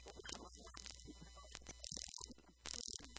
vtech circuit bend006
Produce by overdriving, short circuiting, bending and just messing up a v-tech speak and spell typed unit. Very fun easy to mangle with some really interesting results.
broken-toy, circuit-bending, digital, micro, music, noise, speak-and-spell